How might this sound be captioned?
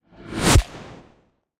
whoosh into hit 001
Designed whoosh into impact
Sweep, transition, Whoosh